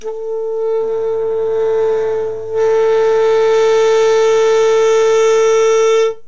Saxophone hoarse sound.
Amostra de som de nota de saxofone longa, arranhada, vibrada.